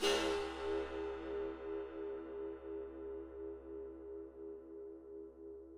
China cymbal scraped.
china-cymbal, sample, scrape, scraped